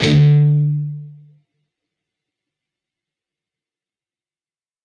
Dist Chr Drock up pm
A (5th) string 5th fret, D (4th) string 7th fret. Up strum. Palm muted.
rhythm-guitar, distortion, distorted, distorted-guitar, chords, guitar-chords, guitar, rhythm